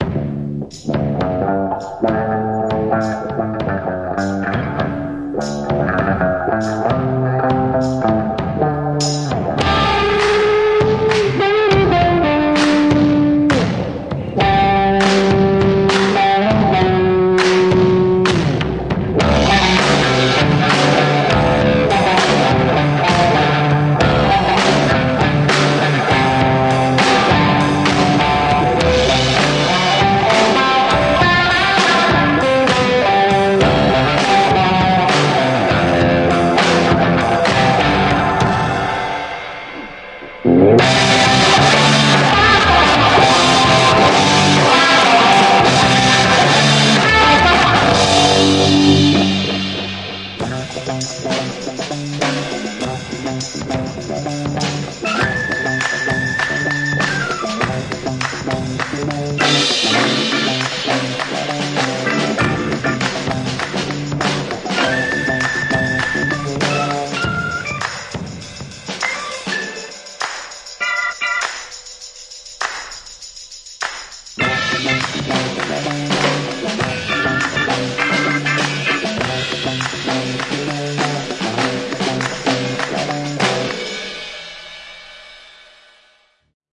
Vintage Montage music
super basic track with a vintage spring reverb guitar sound
organ, distorted, lo-fi, crushed, 70s, 60s, tambourine, old, drums, vintage, guitar, spring-reverb, montage